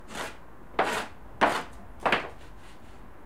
metalworking.rumble
Rumble in metalworking.
Recorded 2012-09-30.
builder, clash, clang, metalworking, noise, rumble, metal, construction, elector, repair